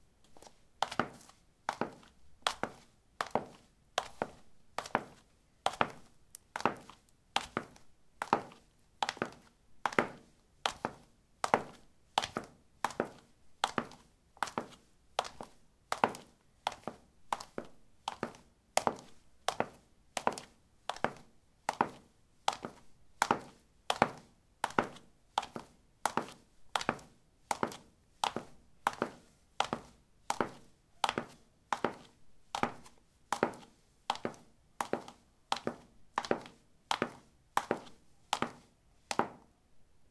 Walking on ceramic tiles: high heels. Recorded with a ZOOM H2 in a bathroom of a house, normalized with Audacity.